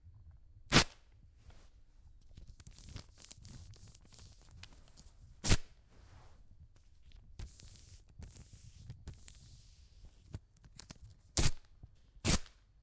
wax 2 mono
Variation 2. Recording of a woman waxing her legs. Multiple actions were recorded. RODE NTG-2 microphone
legs, wax